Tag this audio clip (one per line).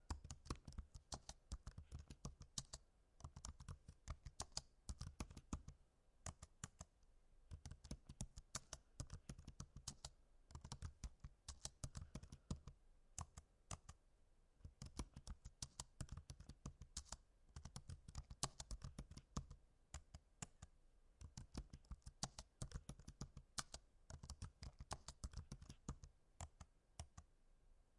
typing
keys
computer
typewriting
writing